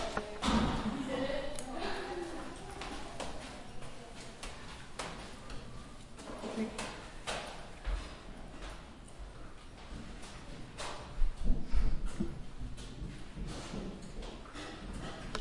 stairwell, ambient noise
It was recorded in a stairwell of library. About three people were come and go. It was more ambient sound.